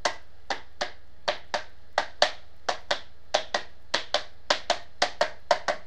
ducttapenoise two accelrando
The sound of two still packaged rolls of duct tape being hit together on the side of each roll. This sample is of a series
of beats, all of moderate pitch and has a fairly short decay. It is in
a kind of two pattern, similar to a dotted quarter note followed by an eigth note, with the dotted quarter accented. Through the series of beats there is a slight accelrando.
accelerando, rhythmic, tempo-change, percussive, rhythm, click, beat, duct-tape